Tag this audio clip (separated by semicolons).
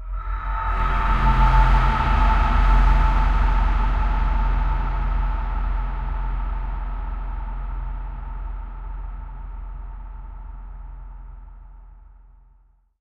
drone; ambient; long-reverb-tail; deep-space